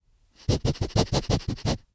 Sonido: 19
Etiquetas: Sniff Audio UNAD
Descripción: Captura sonido de Snifeo
Canales: 1
Bit D.: 16 Bits
Duración: 00:00:01